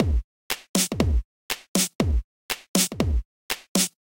120 bpm kick snare thumper double claps
house, techno, drums, hop, trance, dance, edm, snare, beat, hip, electro